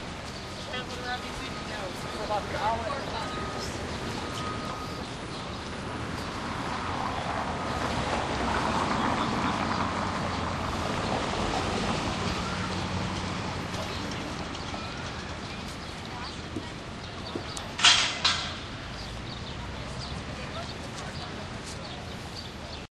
philadelphia independencehall front
Out front of Independence Hall in Philadelphia recorded with DS-40 and edited in Wavosaur.
city, field-recording, independence-hall, philadelphia